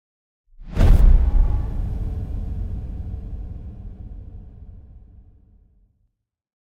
Woosh, Dark, Impact, Deep, Ghost.